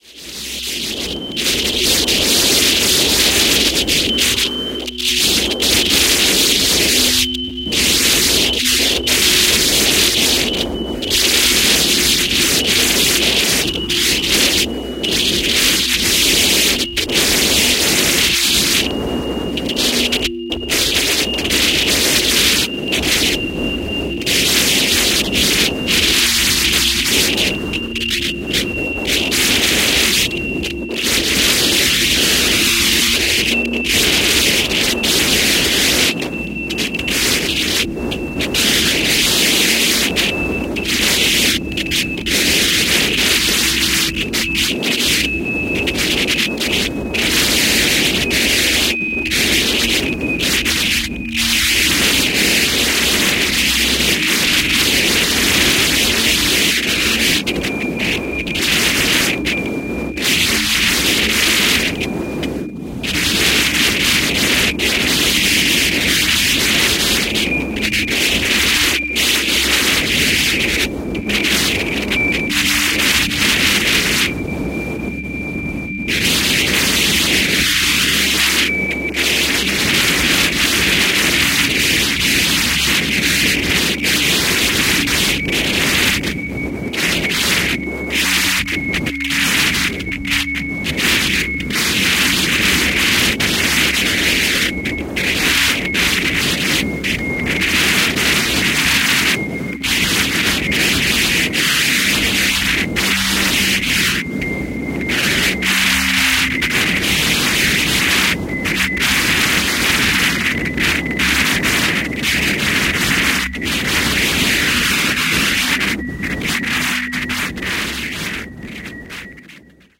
This sample is part of the “Wind” sample pack. Created using Reaktor from Native Instruments. Wind with some electronic interference. Quite noisy and experimental.